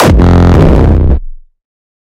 Hardstyke Kick 15
bassdrum, distorted-kick, distrotion, Hardcore, Hardcore-Kick, Hardstyle, Hardstyle-Kick, Kick, layered-kick, Rawstyle, Rawstyle-Kick